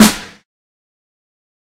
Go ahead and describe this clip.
Snare 42 of the Zero Logic kit I made :DYou're not getting them all :
drum, zero, logic, snare